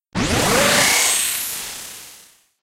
Power up machinery sound